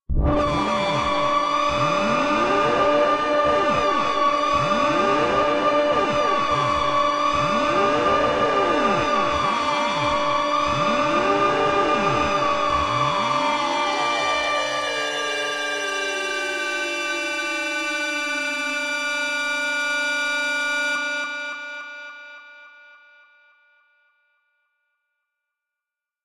Sci Fi Growl Scream D
A strong, aggressive electronic growl/scream.
Played on a D note.
Created with Reaktor 6.
apocalyptic, effect, futuristic, growl, machine, mechanical, robot, science, scream, sfx, sounddesign, torment